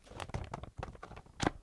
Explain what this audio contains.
Dull slide and hit